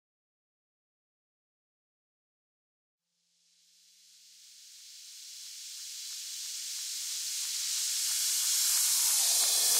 reverse fx 6
riser, sweep, fx, up, reverse, build, buildup, swell, uplifter